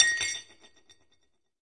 Dropping a saucer shard, which after impact wiggles on the floor.
Recorded with:
Octava MK-012 ORTF Stereo setup
The recordings are in this order.
breaking, dropping, falling, floor, glass, glasses, ortf, saucer, xy